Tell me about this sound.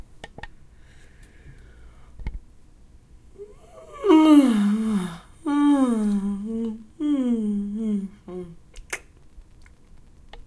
voice of a female yawning
vocal, yawning, female